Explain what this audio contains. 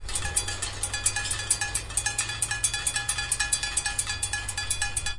fan noise to scare birds.
scare, field, birds, sound